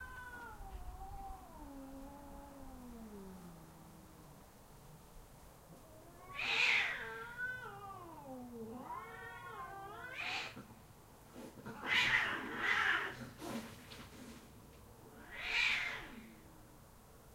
Cats Fighting
"You want sum? I'll give it ya!" Two neighborhood cats going at it.
This is the original recording straight from a Tascam DR-05 Linear PCM recorder. An amplified version and an amplified version with noise-removal of the same recording are also available.
pets, animals, hiss